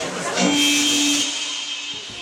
Overload noise sound occurs when error PA and mic operation.